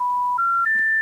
More 'special information tones' or SITs you hear when a call has failed. It consists of three tones with rising frequency.
telephone, phone, special